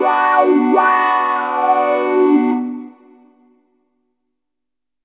FunkySynth Talkwha-play-wheel wow 095bpm
A Funky lead-synth produced with the new on-board talkwha effect, something between talkbox and whawha. Was played with the modulation wheel to produce the voice-like double "wow".
1 bar, 095 bpm
The sound is part of pack containing the most funky patches stored during a sessions with the new virtual synthesizer FM8 from Native Instruments.
funk, funky, gate, lead, loop, rhythym, sequence, synth, synthesizer, talkbox, wha, wha-wha